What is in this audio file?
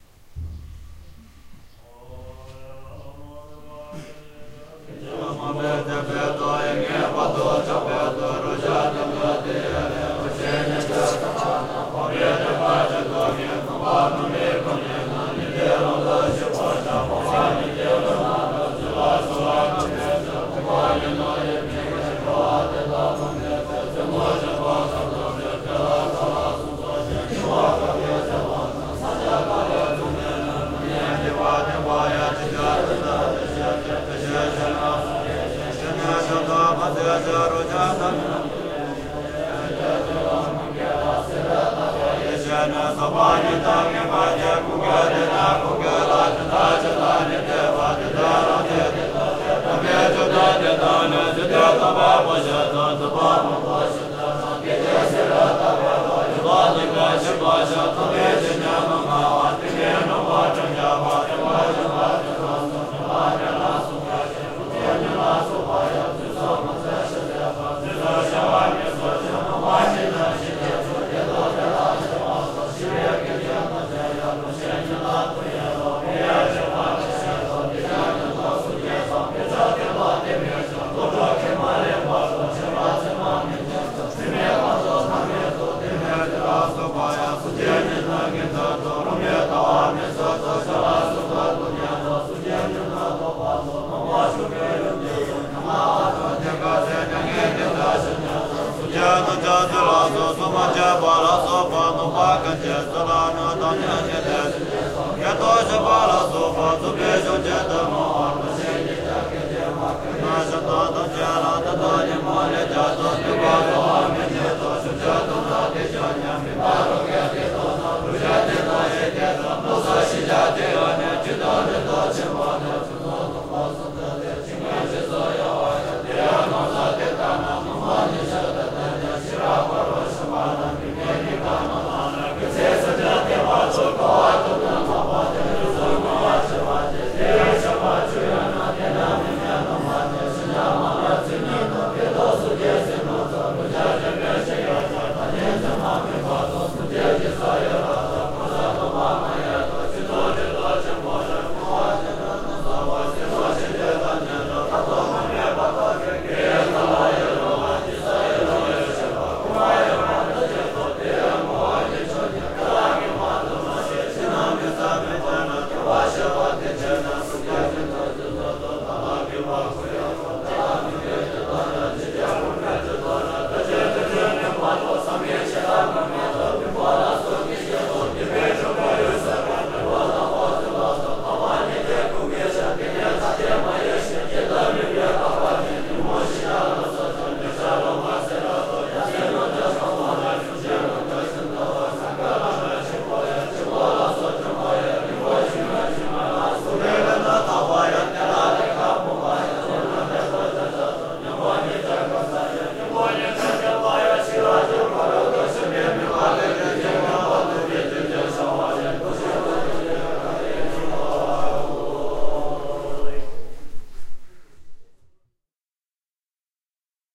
Ganze Gompa monks puja དཀར་མཛེས
dr1 field recording inside ganze gompa, tibet, of the monks morning meditation prayer ritual.
buddhist, chanting, monastery, temple